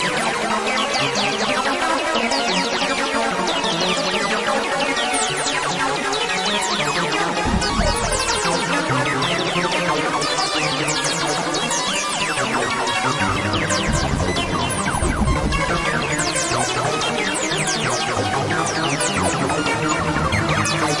acid 303 arp mash up
crazy acid mash up
mash, arp